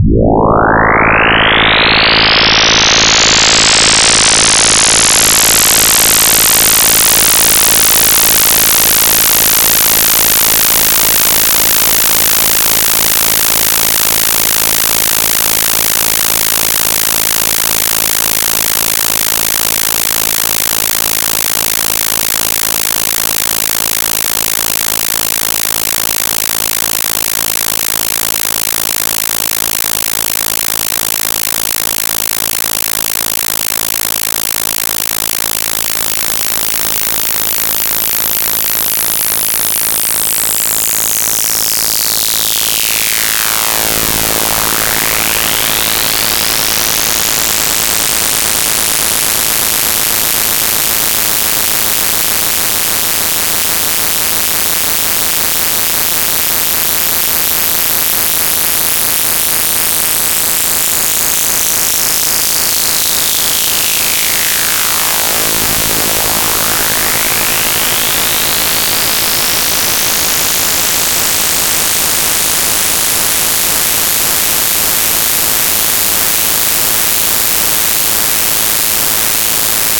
rotosource general sonic
A sound source, emitting 100Hz sine-waves, rotating around your head with around sonic speed, getting faster and faster.
Very high pitched and, in lack of a better word, alternating sound, seemingly starting to interfere with itself after 34 secs. - the sound itself is 80 secs long (1:20 Min)
Finally no glitch!